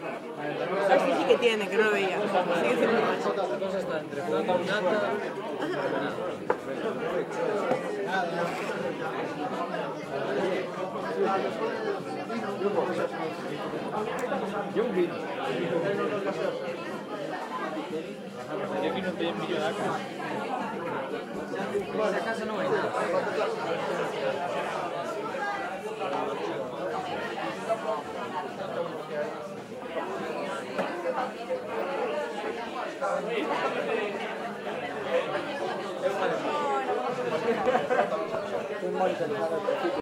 Ambiente - churrasqueria
Environment of a tipical "churrasco" restaurant
MONO reccorded with Sennheiser 416
bar, people, restaurant